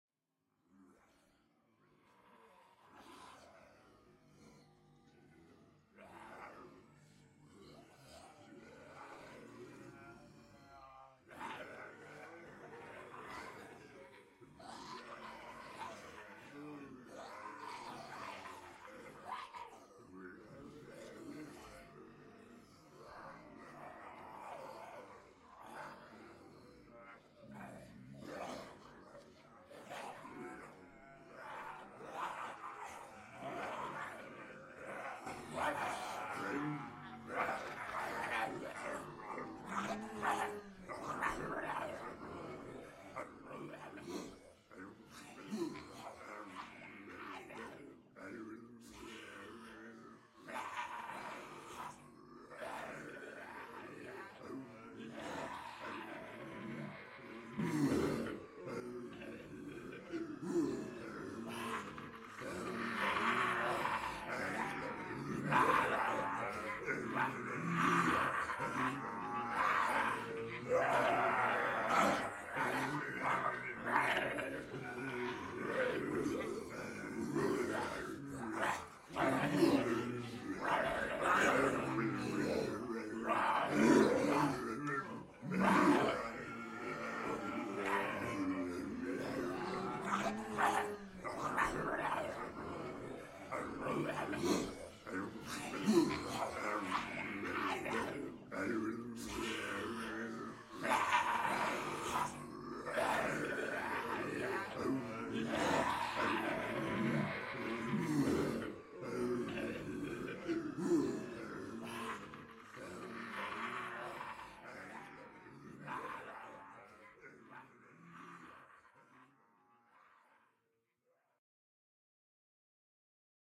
Zombie Group 1B
Multiple people pretending to be zombies, uneffected.
dead-season, ensemble, group, horror, monster, roar, snarl, solo, undead, voice, zombie